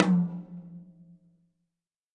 Toms and kicks recorded in stereo from a variety of kits.
acoustic, stereo, drums